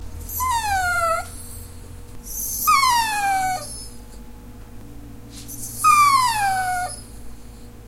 Dachshund Whines
Mini-dachshund whining politely three times. Recorded on Tascam DR-40.
beg, begging, canine, dachshund, dauchsie, dog, doggie, doxie, pet, whine, whining